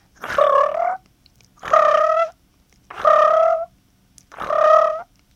space animal
something cute that could be used as a small alien animal. Recorded using Audacity and a Turtle Beach Earforce PX22 headset microphone
space, cute, animal